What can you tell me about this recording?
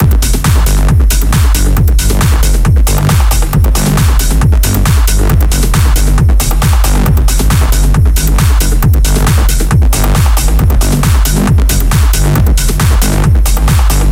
Dark Zion 3
A dark, dance, loop with sidechaining effects and four on the floor.
909, controller, fl, lfo, sidechain, studio, synth1